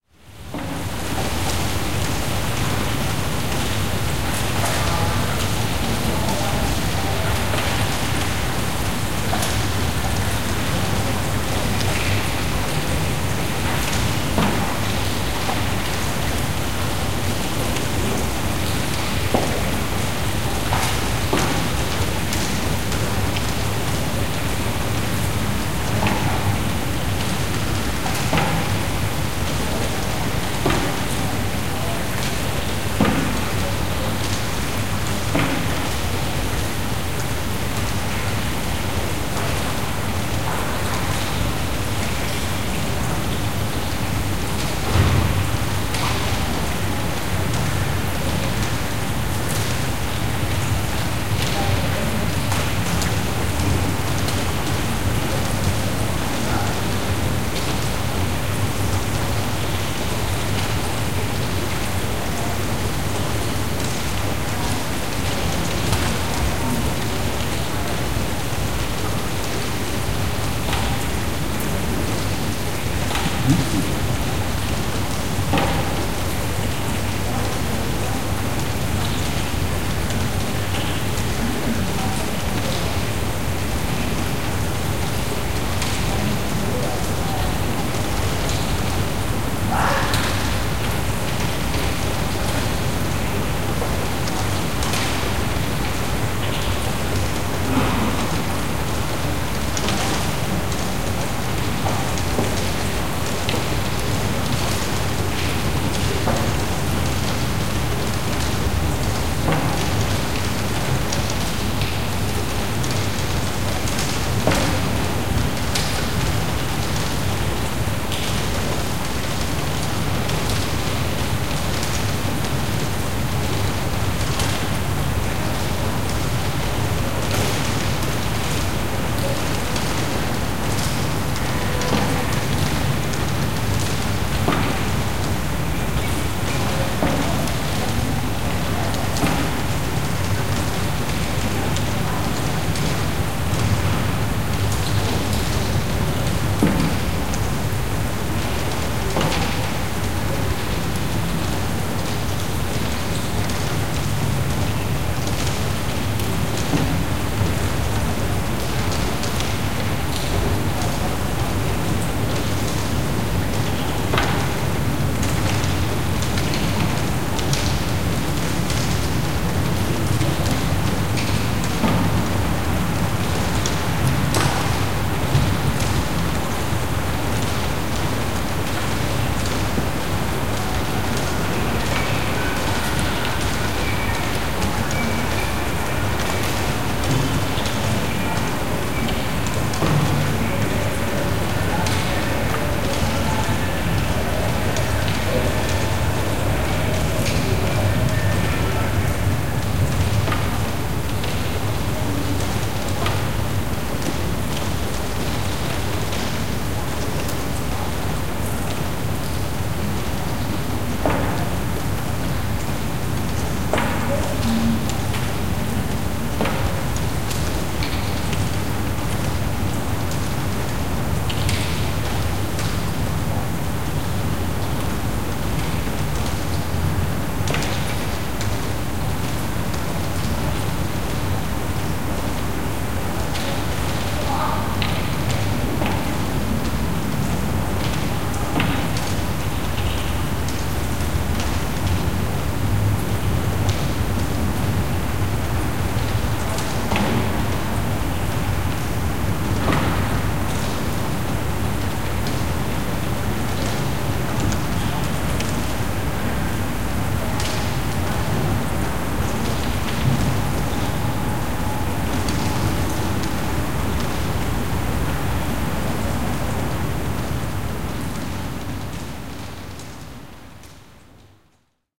prerain backyard
Some pre-rain atmosphere from a Berlin backyard, the dripping of water, some voices. Calm atmosphere.